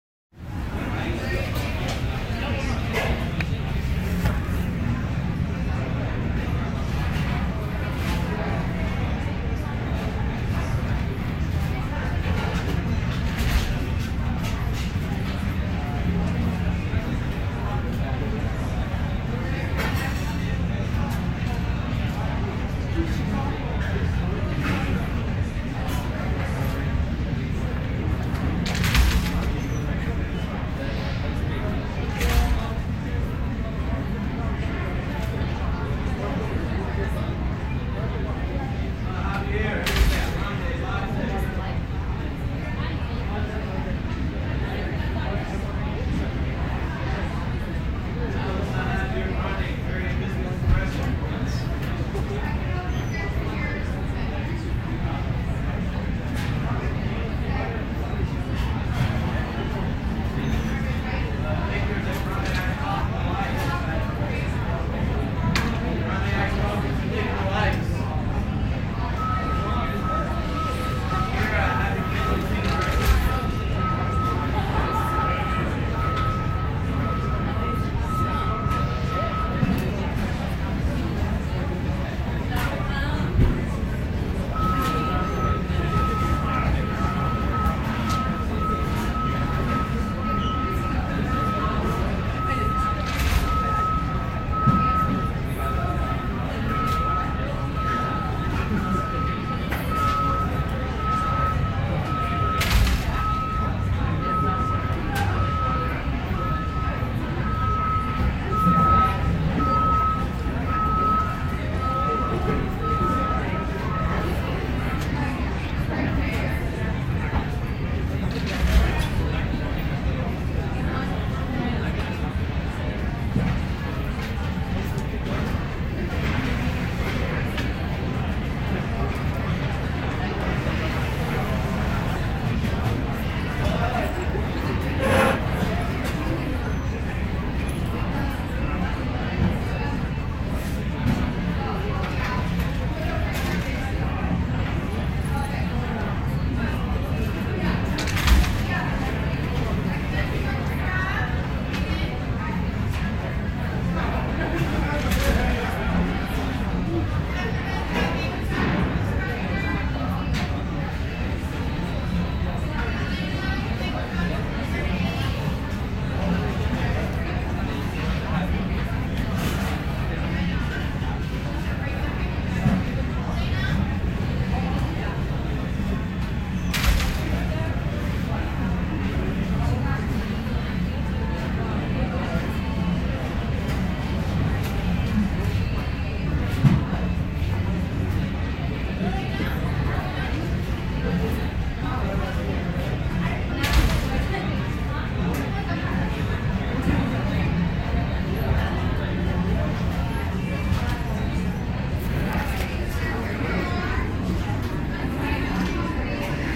Coffee Shop Chatter
Busy college campus coffee shop, includes drinks being called out, nondescript conversations, construction noises.
Recorded on a Samsung Galaxy S8.
chatting, noise, field-recording, voices, crowd, people, shop, chat, cafe, talking, chatter, coffee, restaurant, ambiance, construction, conversation